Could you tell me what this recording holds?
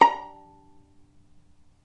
violin pizz non vib A#4
violin pizzicato "non vibrato"
non-vibrato, violin, pizzicato